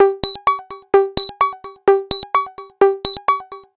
Synth Loop 18
Synth stabs from a sound design session intended for a techno release.
design, electronic, experimental, line, loop, music, oneshot, pack, sample, sound, stab, synth, techno